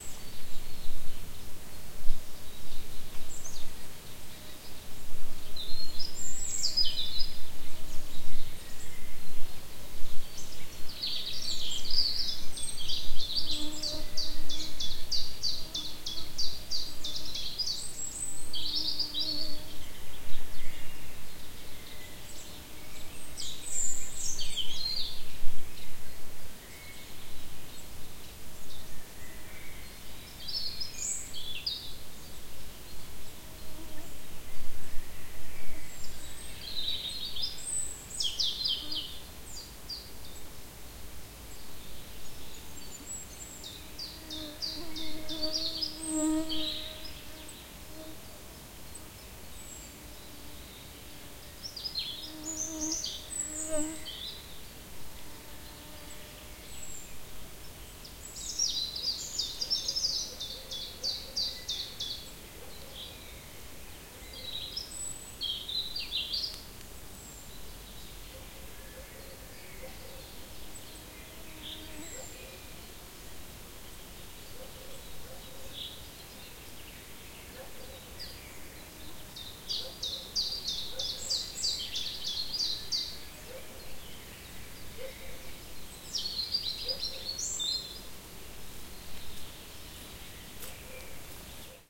Forest in the morning, Northern Europe, in June